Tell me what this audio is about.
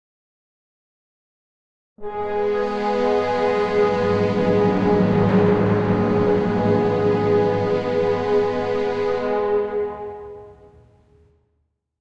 The final chord
end, final, Finished, line